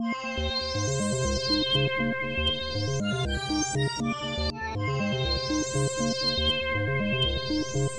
The learner chords combined with the learner synth loop